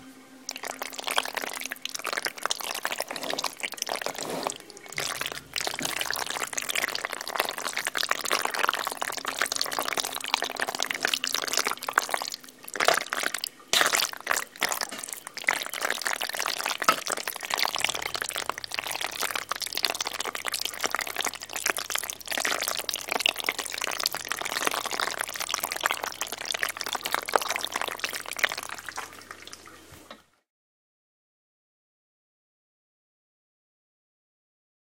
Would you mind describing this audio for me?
recorded while stirring noodles with tomatoes sauce in a pot
greasy, stirring, snort, slick, slime, noodles